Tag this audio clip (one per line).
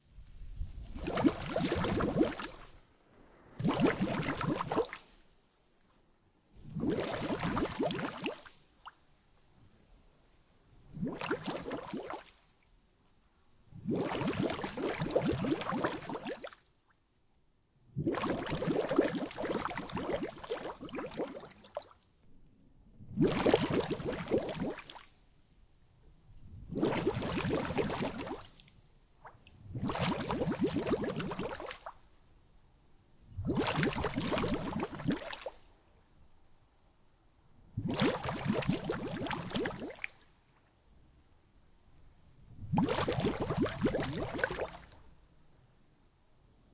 bubbles
outdoors
water
scuba-diver